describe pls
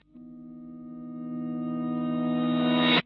Guitar Swell
A reversed Maj7 chord with "shell voicing" (no third). Recorded with an sm57 in front of a Fender Blues Junior.
electric, reversed, riser, swell